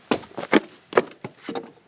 A very short series of quick footsteps on loose wooden planks, recorded at close range.